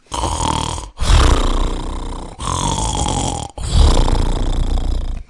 Ronquidos Sound WET
A extreme snoring while sleep
Sleep, Snoring, Extreme